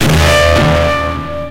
screechy guitar thing
A random sound from the guitar.
distortion, effect, electric, guitar